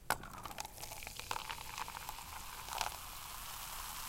pouring soda in a cup
soda, pouring